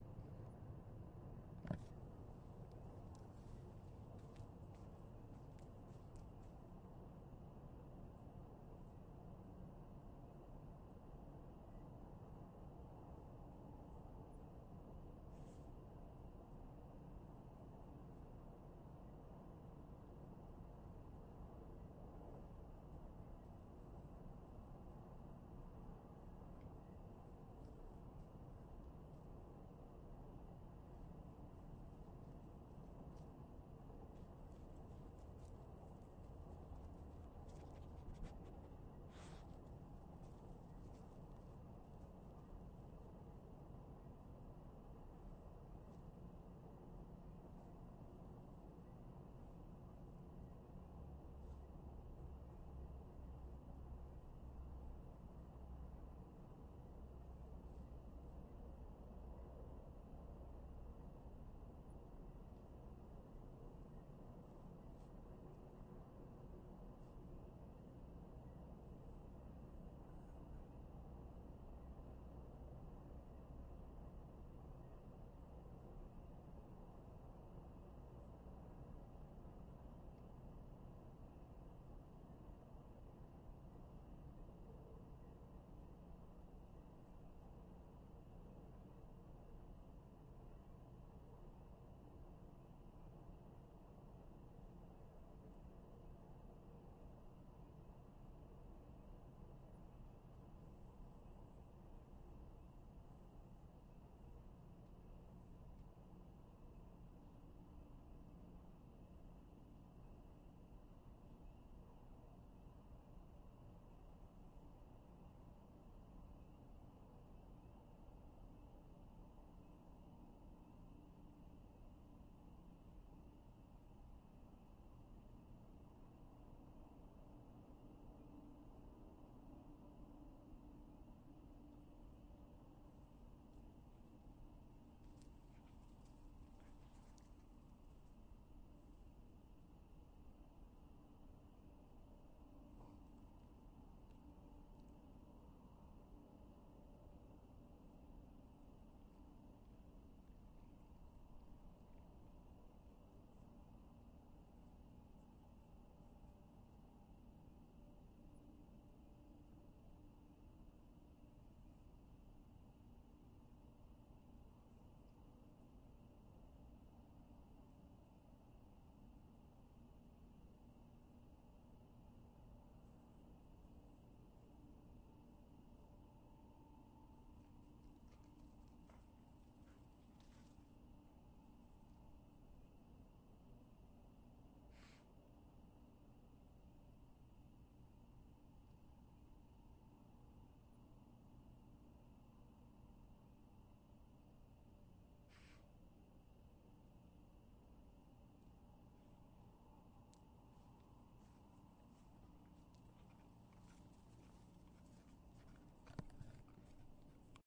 A field recording from my acreage.
night, country, crickets, ambient, industrial, background, rural, countryside